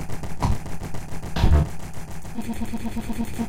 sax realtime edited with max/msp
loop, dark, edited, nausea, voice